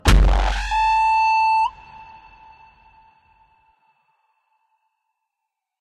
This sound recorded.
This sound is needed for use in strong game actions or for music and sounds.
Thanks you for listening my sound!
drama, horror, drammatic, bass, kick